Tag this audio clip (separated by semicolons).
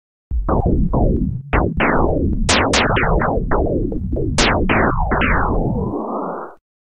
digital; synthetic; additive; synth; synthesizer; weird; noise